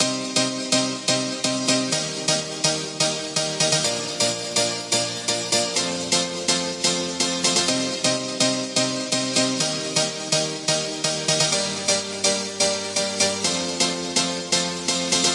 Here's a little chord loop I made. Please, if you use it, could you give me the links to your projects in the comments down below :)? Thank you in advance and I'm looking forward to see your creativity!
Enjoy!
Information:
Key: C minor.
Tempo: 125 BPM